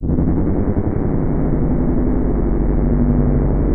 creepy ambient 4
creepy, thrill, anxious, loop, terror, ambient, spooky, nightmare, scary, suspense, terrifying, sinister, weird